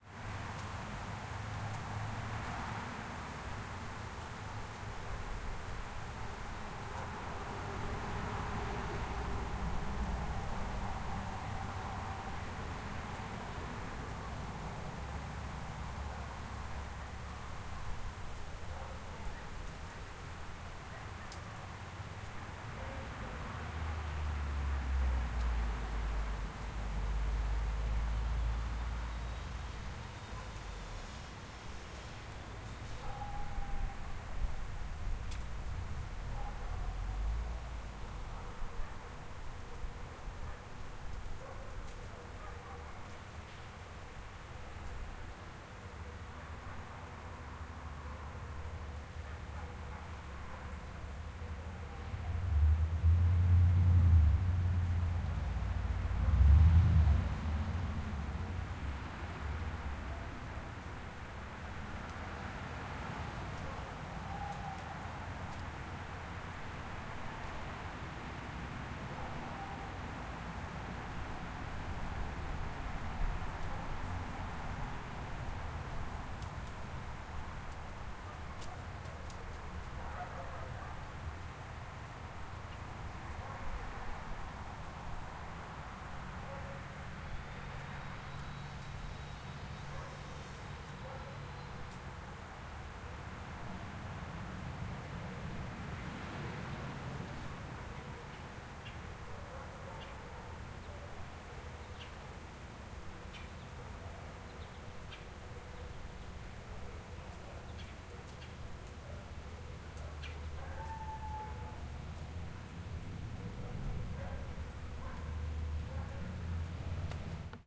Suburb fall day near road
Sounds captured from a fall day in a suburb. Light traffic nearby. You can hear leaves falling from the trees and hitting the ground.
suburb, fall, light, traffic, day, ambiance